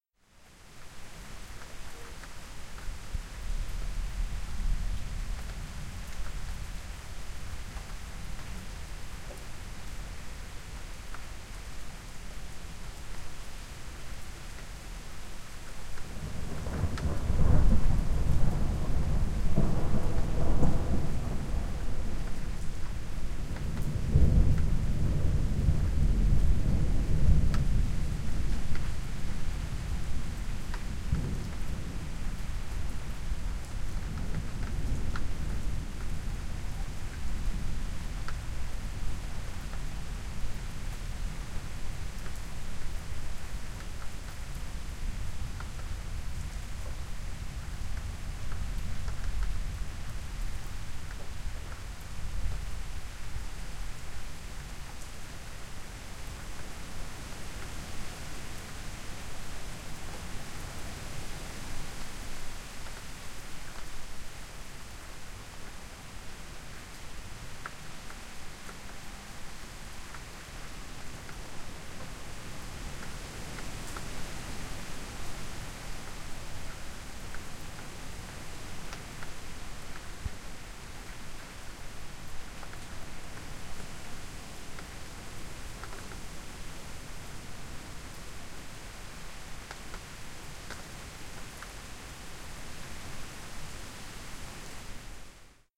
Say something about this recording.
Suburb ambience, light rain, raindrops on concrete, heavy wind, thunders. Recording weas made with Neumann KM183 + Schneider Disc + Sound Devices Mix Pre 10 II.
storm, nature, weather, lightning, suburb, ambience, thunder, raindrops, rain, wind, trees